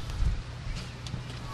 newjersey OC bw underloop mono2
Loopable snippets of boardwalk and various other Ocean City noises.
monophonic, loop, field-recording, ocean-city